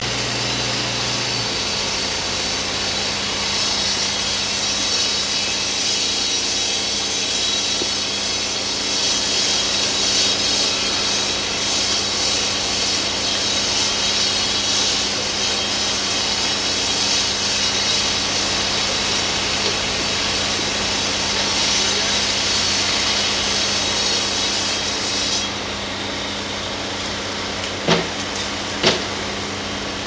Sound of a circular saw used at a construction site. Recorded on a Marantz PMD661 with a shotgun mic.